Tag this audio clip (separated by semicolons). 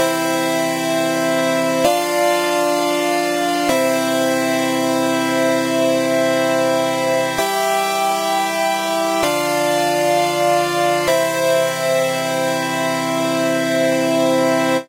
free; games; 8; bit; 2015; loops; castle; fruity